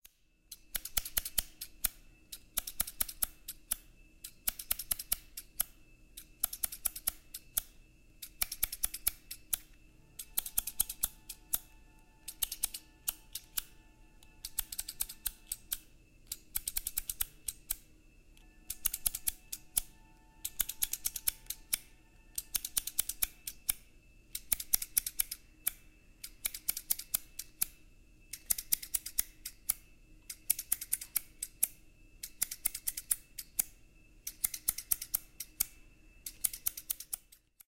Edwards Hands
A snapping jolly scissor who is just trying to make it in the world. One snipping job at a time.